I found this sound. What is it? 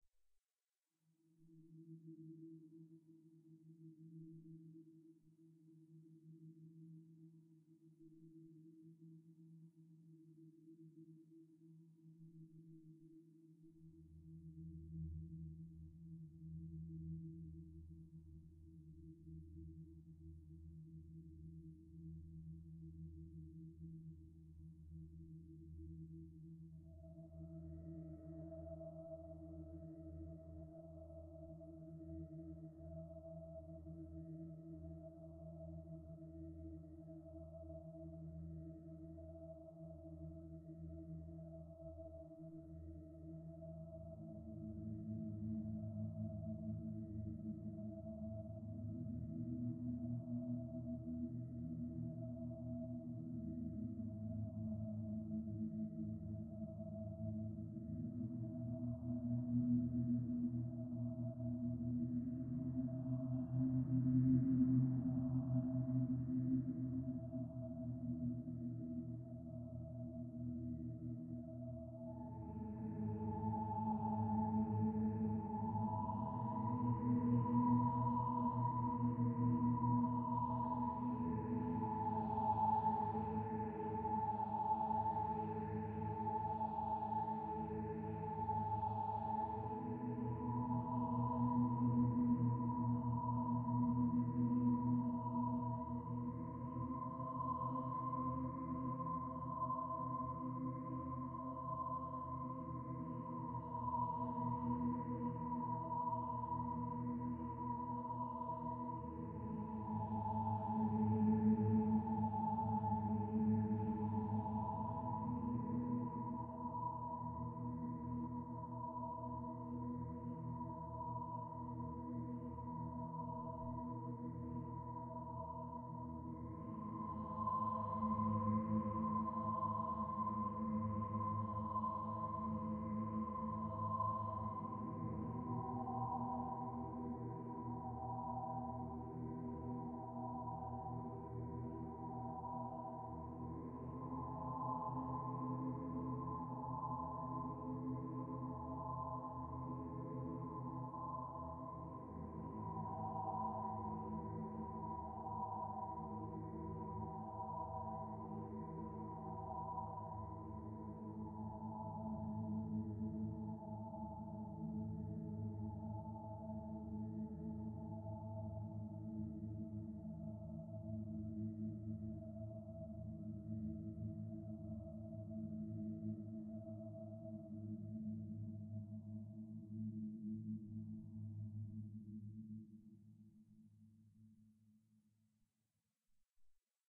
lonely music
A music piece designed to bring a lonely and dark feeling to a project. created by using a synthesizer, recorded with MagiX studio, edited with audacity.
music, dark, ambience, synth, atmosphere, lonely